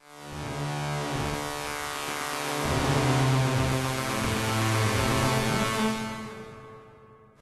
laser sipper
Some dark noisy notes with some echo played on a Nord Modular synth.
nord, sequence, loop, digital, synth, dark